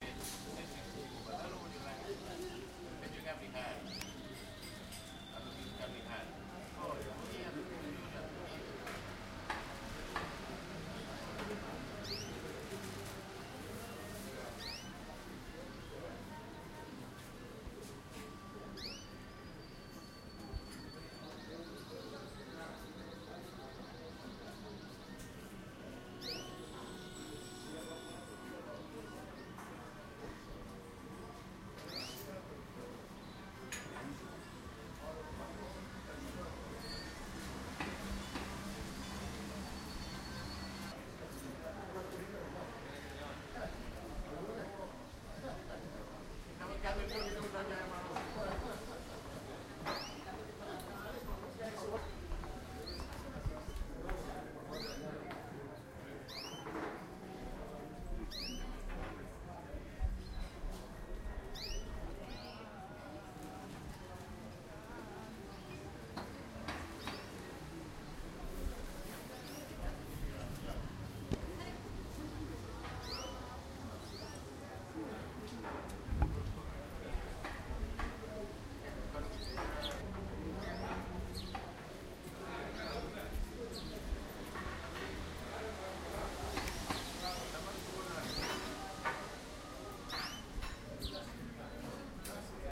Soundscape in the city center Limassol.
bird, city, Cyprus, people, recording, square, street, town